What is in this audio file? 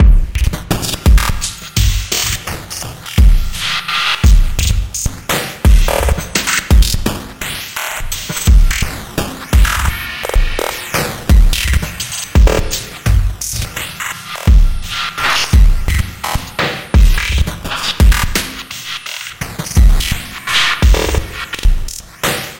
Glitch Drum loop 9h - 8 bars 85 bpm
Loop without tail so you can loop it and cut as much as you want.
drum, drum-loop, electronic, groovy, loop, percussion, percussion-loop, rhythm